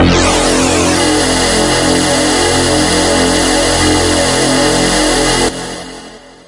SemiQ leads 3.
This sound belongs to a mini pack sounds could be used for rave or nuerofunk genres
abstract, effect, electric, fx, intros, lo-fi, sci-fi, sfx, sound, sound-design, sounddesign, soundeffect